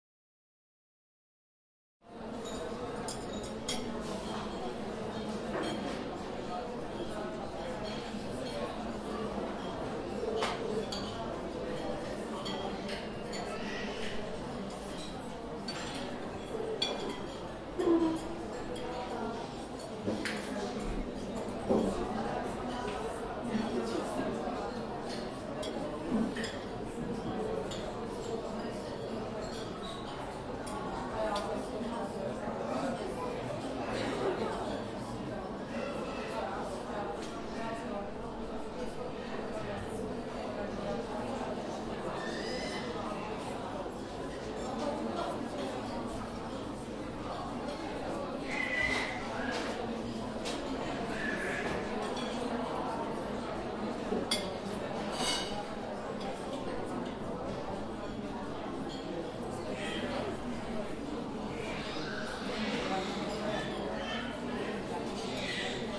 Ambient. Recorded by TASCAM DR-40 in Ikea.